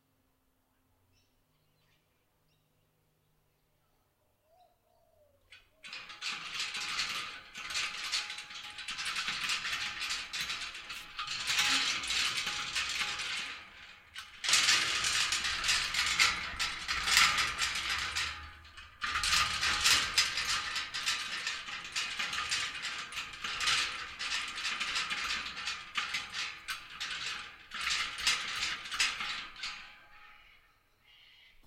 The sound of an iron fence being shaken.
Bird, Cockatoo, Fence, Iron, Outdoors, Shake